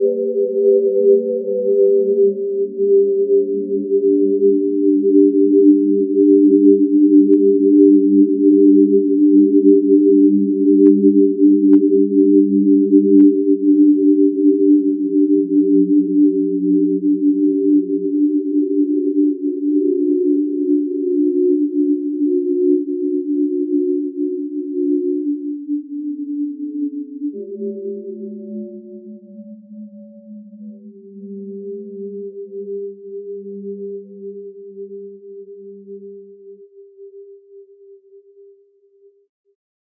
77891 Hello Soundscape
abstract, ambient, creepy, harmony, mellow